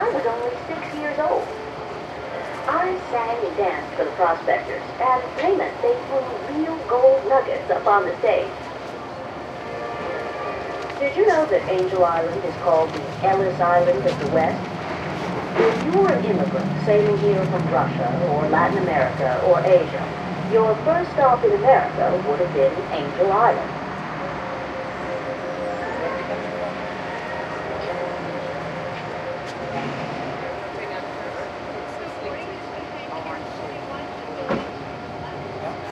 Kiosk at an amusement park in San Francisco 2009 (SF Ca. USA.)
field-recording, fair, san-francisco, rides, park, fun, california-history, san-francisco-bay, carnival, pier-39, history, amusement, purist